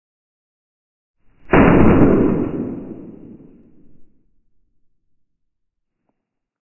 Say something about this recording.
Cap Explosion Big
Although my individual cap explosions didn't turn out, I was able to layer them altogether to make one, big boom!